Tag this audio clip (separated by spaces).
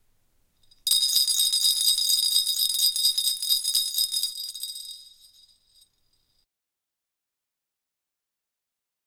bb bell glass jingle